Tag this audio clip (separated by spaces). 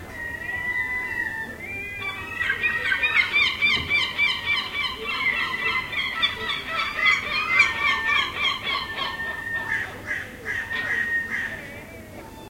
animal birds seagull